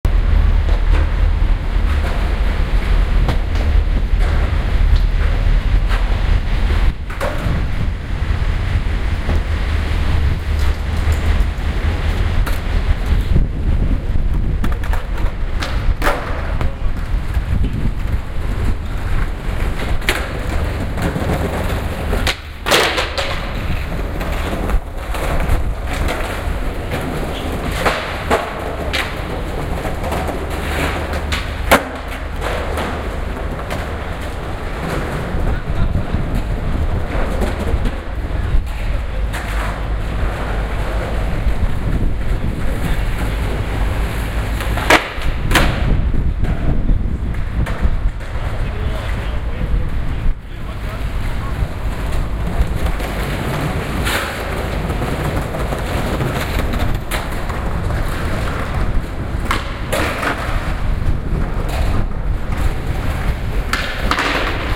Waterloo, south bank skaters